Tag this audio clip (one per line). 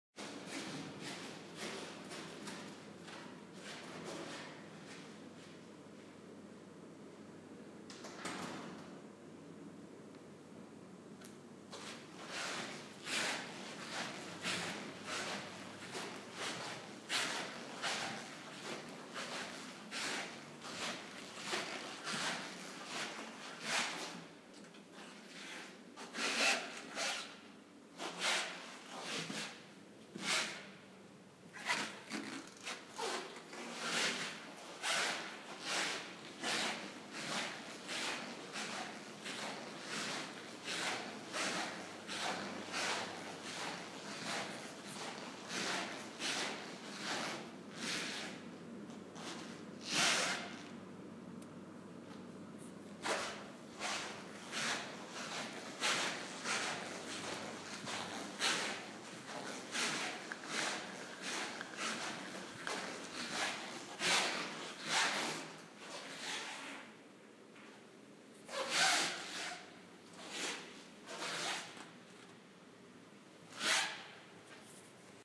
floor
Zapatos